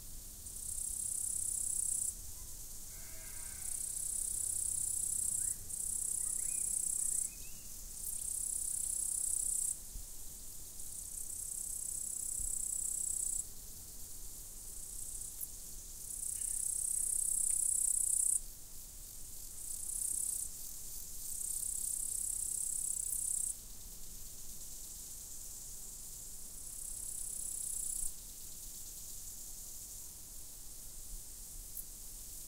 Louka cvrcci-Meadow with crickets
crickets, day, Meadow, sheep, summer